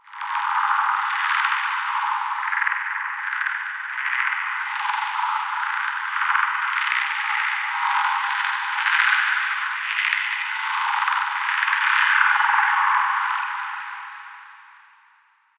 electronic reverberated pulses sequence
pulses, synthesizer, transformation